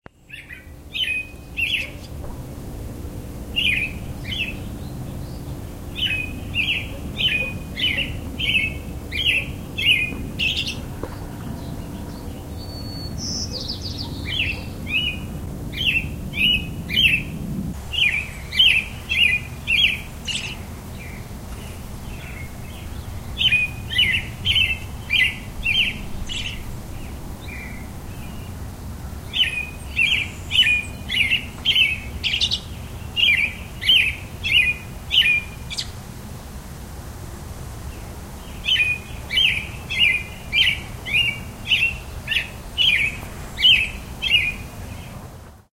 ROBIN SONG
These are two separate recordings with my Yamaha Pocketrak of a single robin and you can hear the other robin answering in the distance. Though I like to watch robins pull worms from the ground and love their song, they also have a bad habit of sitting on my truck mirrors and crapping on my truck doors.
Day, In, Late, Robin, Singing, Spring, Sunny, Tree